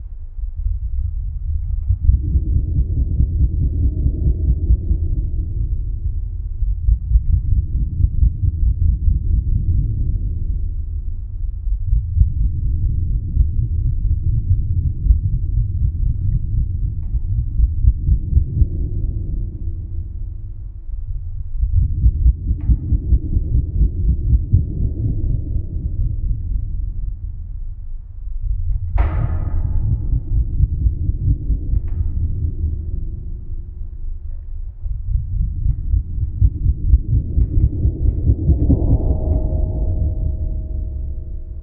Metal Board Wobble Big
A big metal board wobbling.
Board, Wobble